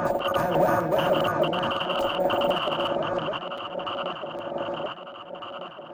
Bed of shuffling static with pulses of throaty mid-lo bass purr inter spaced with randomly inserted male vocal fragment saying "and"
loud to soft
FX: